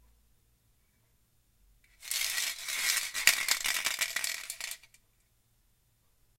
shake empty spray
shake a empty spray.